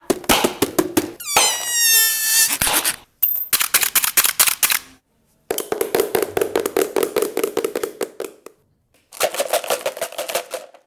Soundscape LBFR serhat valentin
france, labinquenais, rennes, soundscape